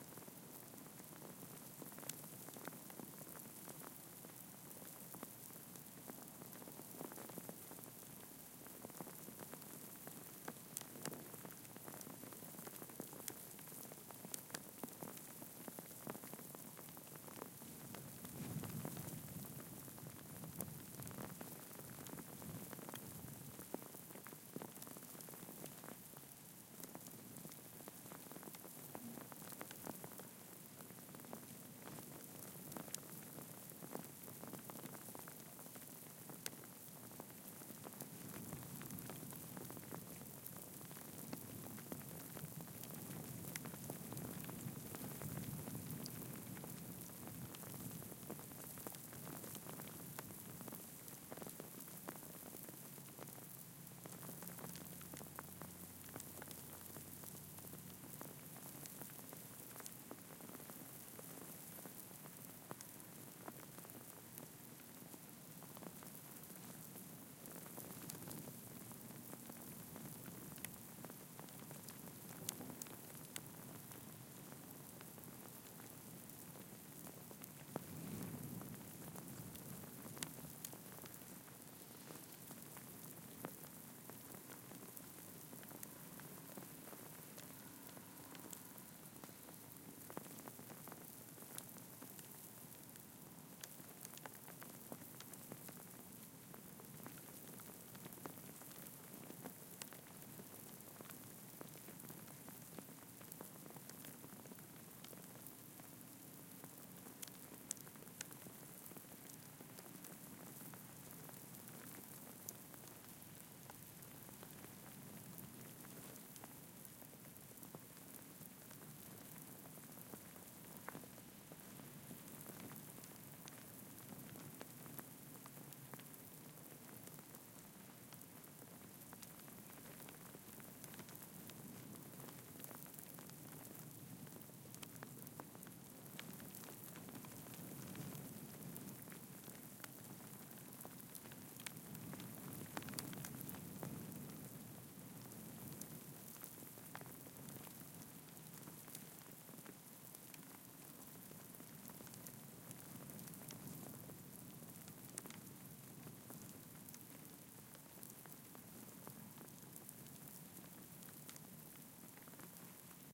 Recording of an ice storm
blizzard, Canada, field-recording, hale, ice, nature, Naujaat, Nunavut, outside, sleet, storm, weather, wind, winter